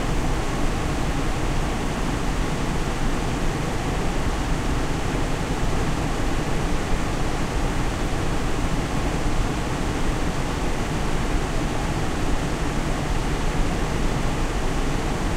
AC fan loop
My window air-conditioner fan, edited into a loop. Please use in conjunction with other samples in this pack. Recorded on Yeti USB microphone on the stereo setting. Microphone was placed about 6 inches from the unit, right below the top vents where the air comes out. Some very low frequency rumble was attenuated slightly.
Stereo, Loop, Fan